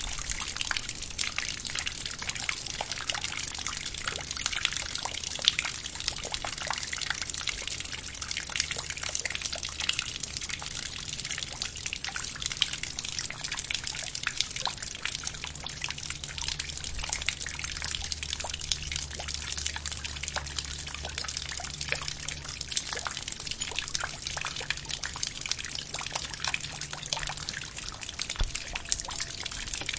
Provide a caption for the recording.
Intense rain drops
This is a recording of a up close mic at a drainage during heavy rain.
drainage, outdoors